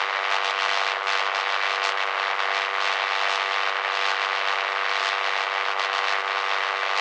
Electrical Noise
Synthesized sound of electric noise.
noise, workshop, power, repair, electric, device, machine, malfunction, static, hum, electricity, zap, laboratory